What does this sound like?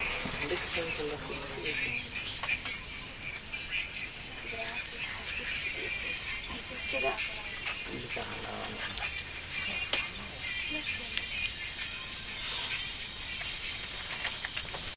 Field recording of sitting inside a bus with no air conditioner hum.